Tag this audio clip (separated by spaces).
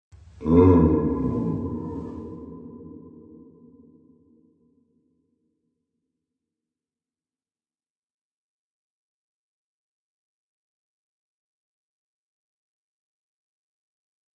beast beasts creature creatures creepy growl growls horror monster noise noises processed scary